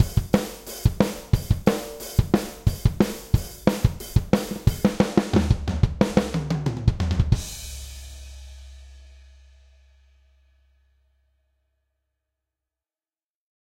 bpm 90 rock drum fill